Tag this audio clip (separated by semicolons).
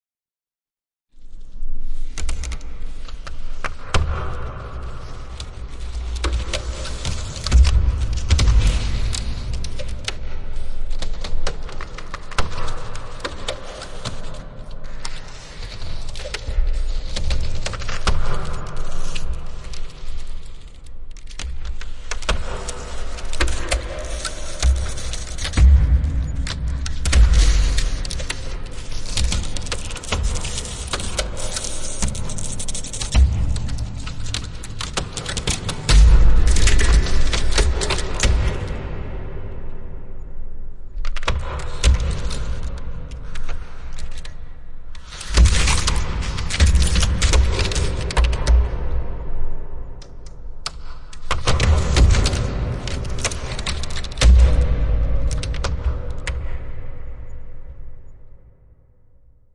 cracks; creaky; effect; fx; horror; plexiglas; sci-fi; sfx; sound-design; sound-effect